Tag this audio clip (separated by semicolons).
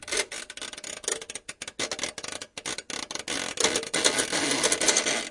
buzz; latch; machine; mechanical; whir